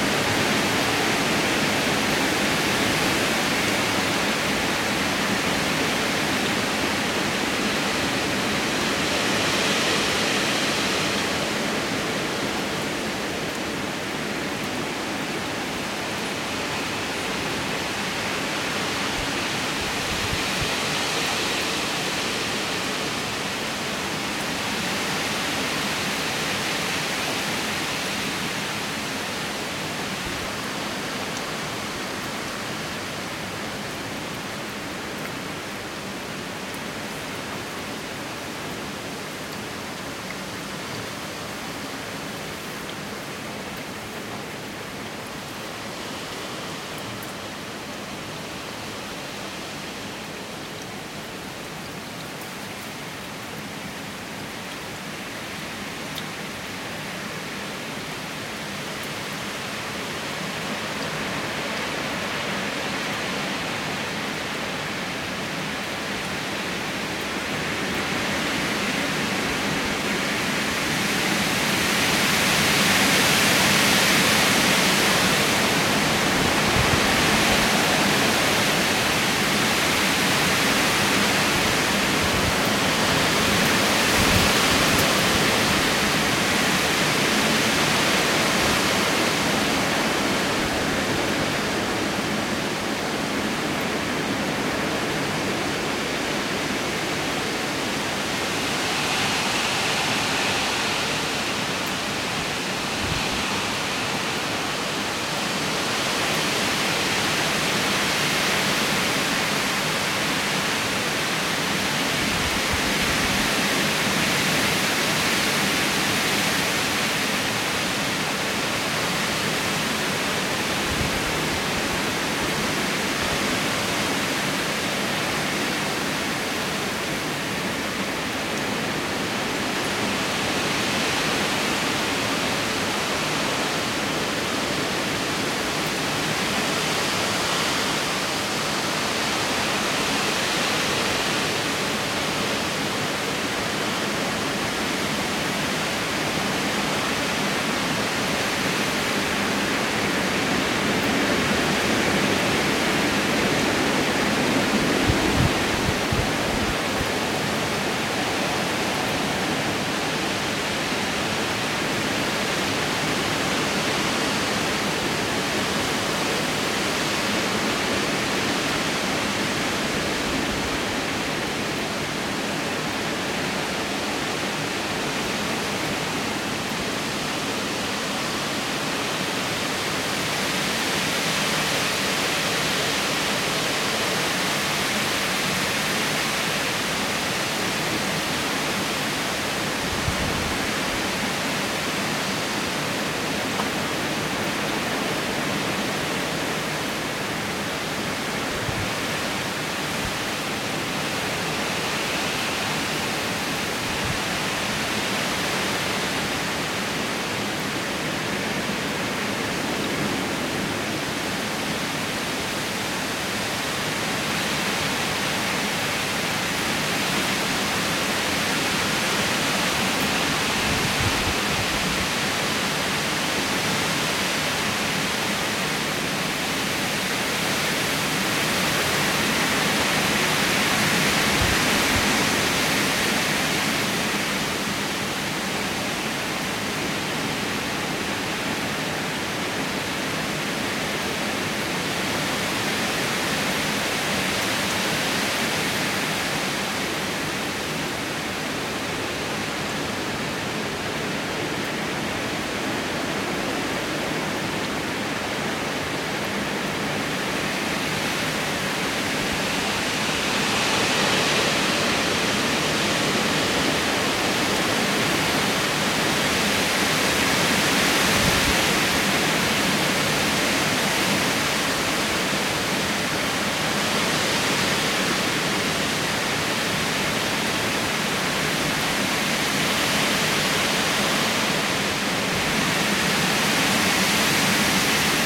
Recording of wind and rain in a storm in south UK, 28th Oct 2013. Wind can be heard blowing through nearby woods and leaves rustling. Mostly white noise hissing with volume rising and falling. Recorded using a Canon D550 out the window/door. The files were edited to remove wind when it directly blows on the microphone.